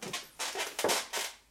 lever chaise6
standing from a wood chair
chair, furniture, squeaky, wood